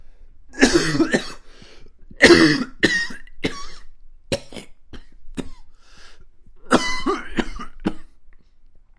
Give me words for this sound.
Recording of me (a man) coughing.
gross, sickness, human, Coughing, ew, sick, man, male